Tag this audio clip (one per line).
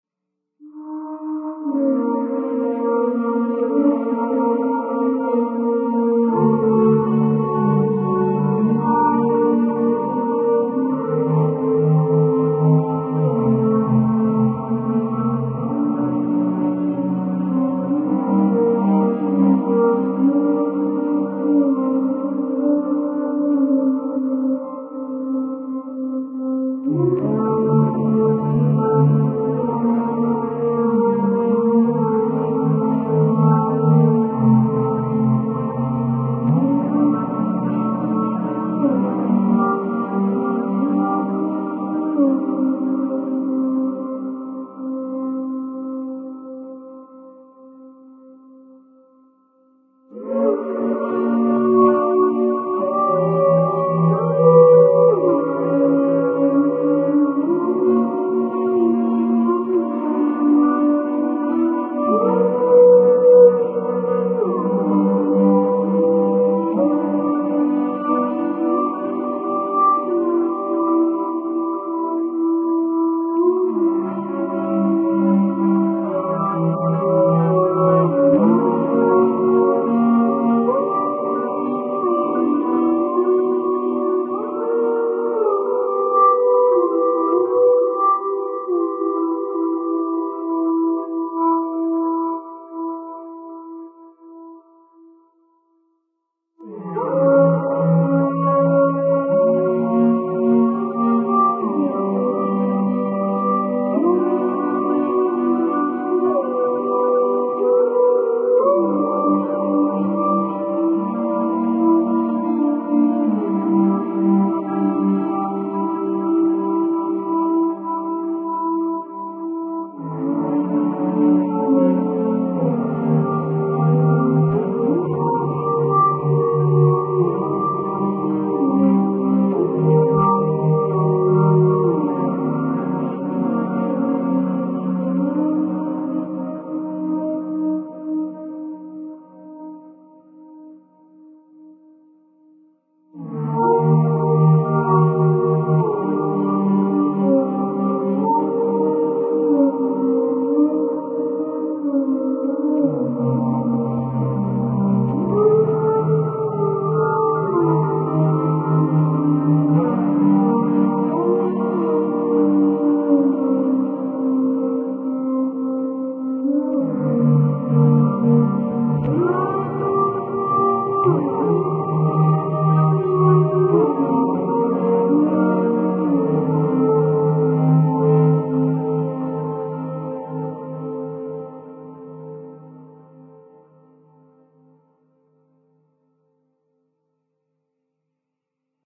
synthetic
male
abox
choral
voices
music